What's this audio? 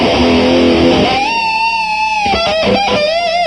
A short clip of of a screaming electric guitar lick I made on my 1982 Gibson Les Paul custom with Marshall amp. It was recorded on a multi track music program, I then added chorus effect, the microphone was hooked directly to the desktop computer with reverb from a 50 watt Marshall amp. This lick was played by me on my guitar.
electric, guitar, rock, solo